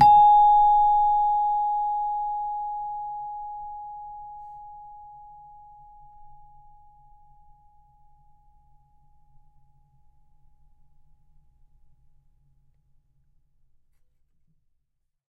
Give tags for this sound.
celeste; samples

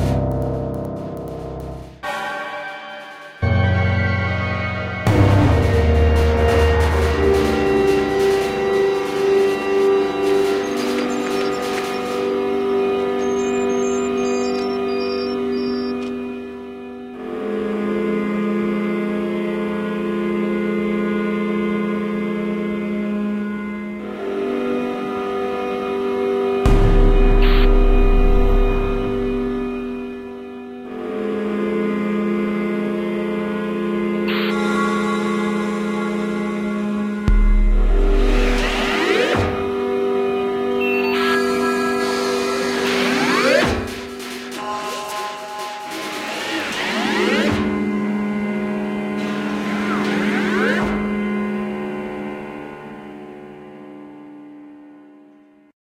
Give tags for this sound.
alien; aliens; approaching; beat; beeps; bleeps; dark; evil; future; futureistic; futuristic; fx; glitch; haunted; haunting; idm; piano; planetary; sound-effects; space; spacy; spook; spooky; star; stars; synth-stab; threat; threatening; threatning; war

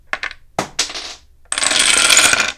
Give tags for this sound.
domino; toy; playing

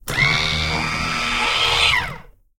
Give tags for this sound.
engine; fostex; fr-2le; motor; ntg3; off; power; rode; run; short; toy; toys; turn

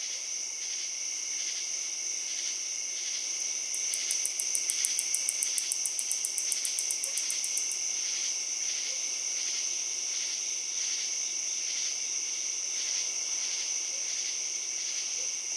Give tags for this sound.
ambient; crickets

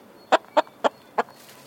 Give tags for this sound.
buck; Chicken

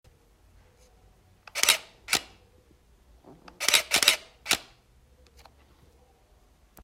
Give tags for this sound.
Camera; Click; effect

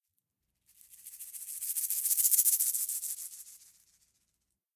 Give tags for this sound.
Restrillar; romper

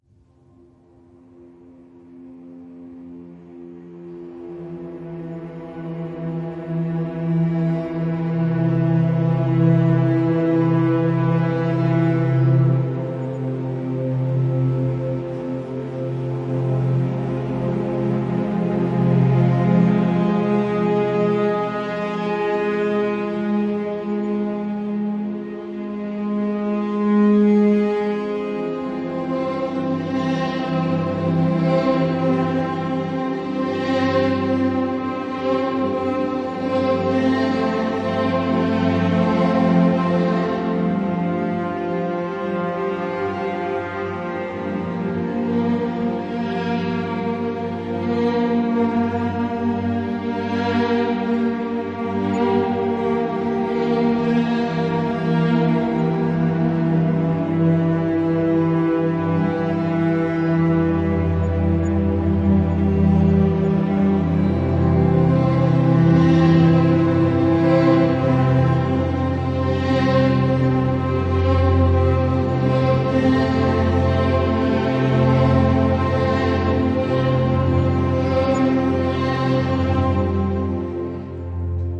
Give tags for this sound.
Movie; Cinematic; String; Sad; Think; Orchestra; Ambient; Surround; Strings; Slow; Comteporary

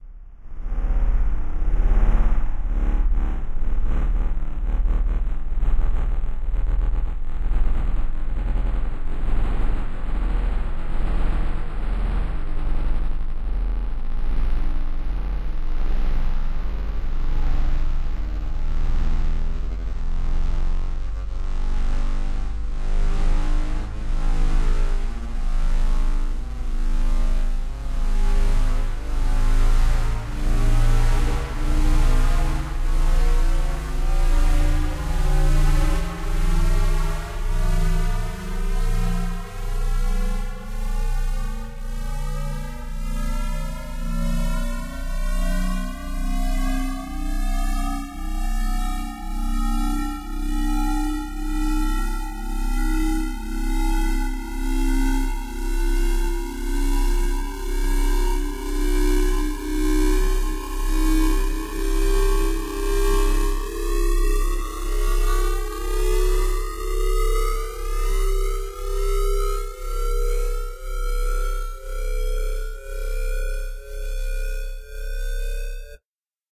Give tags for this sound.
synthesizer; fx; machine; glitch; dark; synth; sine; future; bass; creepy; magnet; noise; electronic; transformers; freaky; scary; effect; transform; sound; lo-fi; sinister; sfx; wave; sound-design; electric; digital; sci-fi; pitch

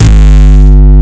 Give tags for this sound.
break; breakcore; breaks; broken; core; dark; distorted; gabba; gabber; house; kick; techno

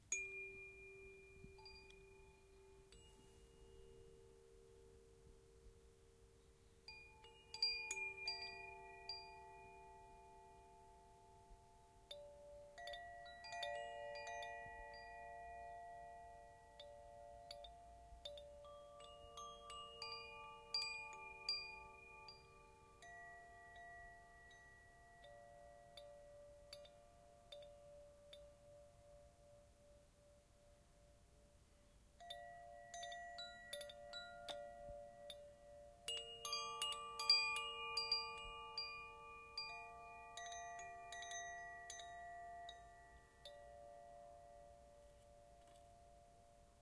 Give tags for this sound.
chimes; natural-soundscape; tone; wind; windcatcher; windchime; wind-chimes; windchimes

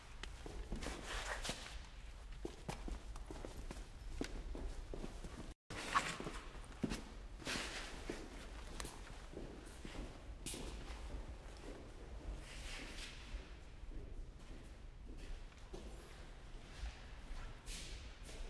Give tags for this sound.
concrete; leather; shuffling; walking

